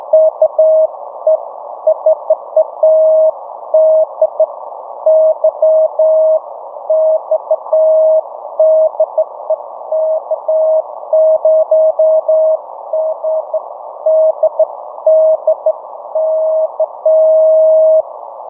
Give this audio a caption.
"KE4DYX DE K0GDD" sent via morse code over the 20 meter band during a QRP QSO.